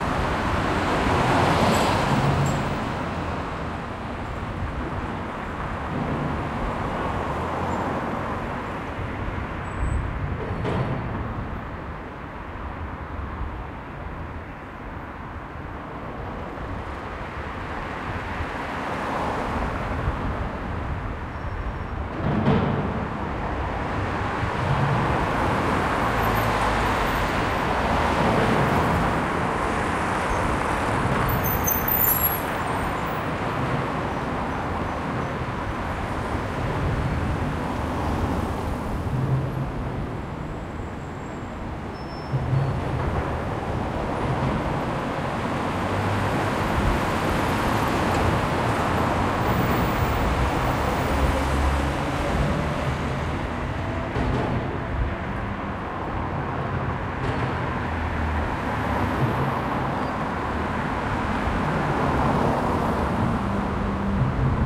road under Leningradkiy bridge1
Sound of road under Leningradsky bridge near top of the bridge. Right river-side.
Recorded 2012-10-13.
2012, noise, road, rumble, atmosphere, cars, atmo, Omsk, Russia, bridge